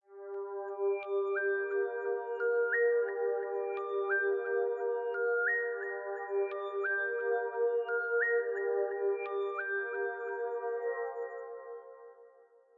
A random abstract effect synth
abstract, effect, synth, sound